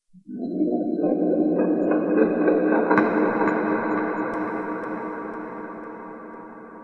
Whirling Sound 1
A sound of spinning one of those round wooden 'doll-inside-of-a-doll-inside-of-a-doll' things. If there's a certain word for it I can't remember. I added reverb and delay and stuff.